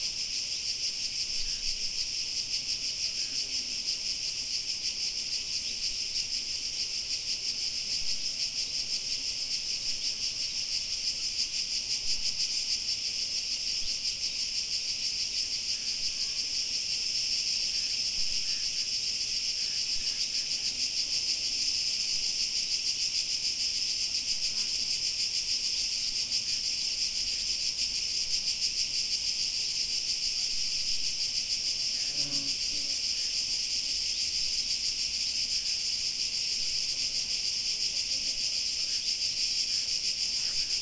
greece naxos cicadas 5
Cicadas happy with themselves near the small village of Tsikalario in Naxos island (Greece). Some wind, flies, birds and a raven can be heard.
cicadas, naxos, wind, greece, 2011, noise, fly, white, tsikalario